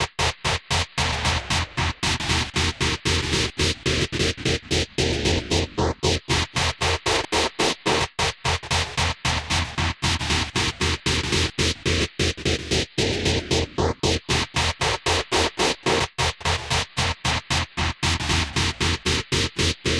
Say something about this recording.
Aggressive sweep synth loop mod.2
Argessive sweep synth loop with more processing
source file: